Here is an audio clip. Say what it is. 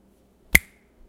A dry-erase marker cap being put on.